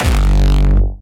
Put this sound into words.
Hardstyle Kick 10
a new kick made from scratch, based on an 909. heavily distroted, squashed, stretched and remixed. have fun with it.
i would love to get links to your work :)
Distortion, Hardcore